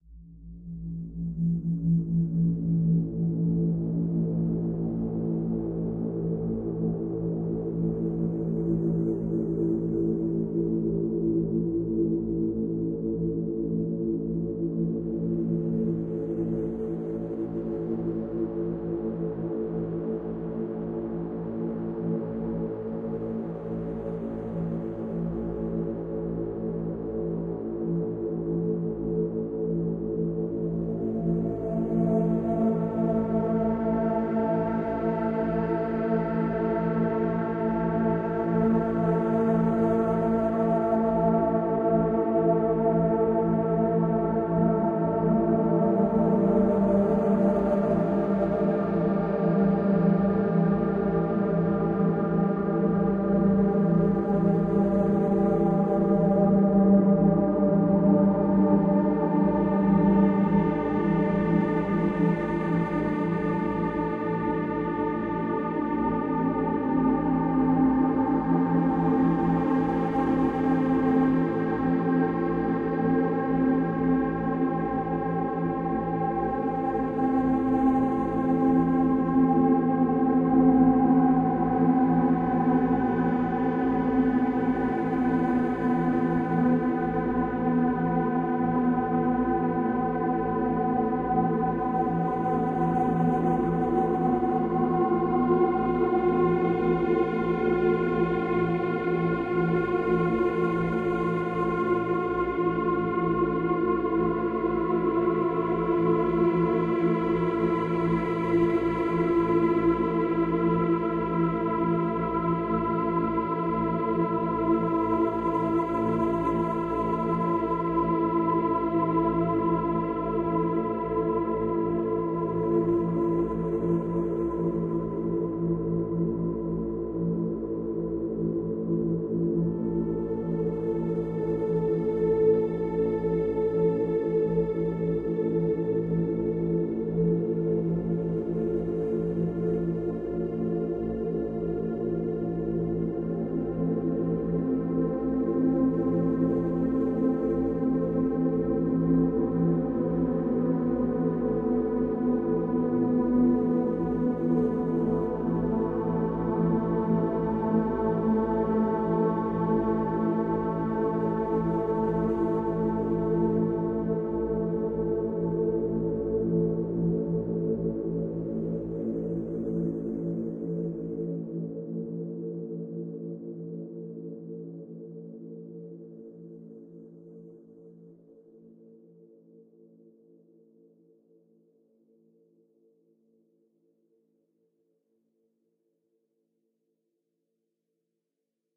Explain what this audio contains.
Dark Planet
This work will add a dark atmosphere to your project.
ambience, ambient, atmosphere, background, dark, deep, drone, drop, experimental, location, menu, pad, soundscape, space, textures